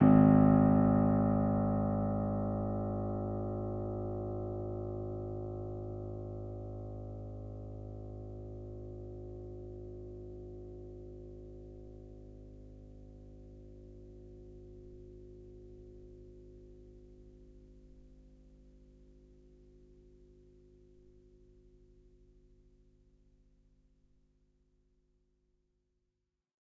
upright choiseul piano multisample recorded using zoom H4n
choiseul, multisample, piano, upright